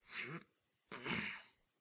A guy straining.